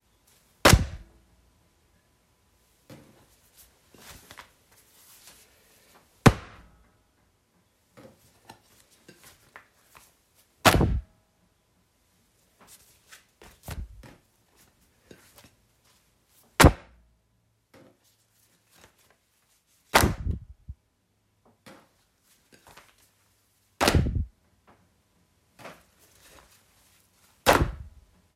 pancakes being dropped onto a plate from around one meter distance, could be used as a punch sound